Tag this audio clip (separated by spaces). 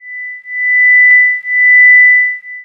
8bit alarm alert alerts beep beeping computer digital robot scifi warning